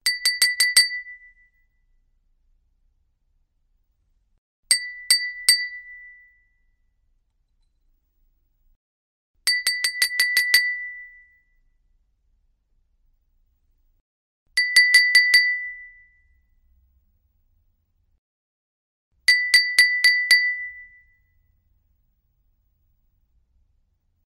golpes copa cucharilla - glass teaspoon clinks
golpes copa cucharilla